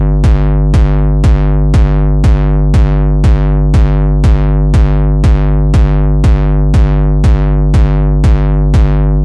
Hardcore Kick Drum 01
bass, beat, distorted, distortion, drum, gabber, hard, hardcore, kick, kick-drum, kickdrum, progression, techno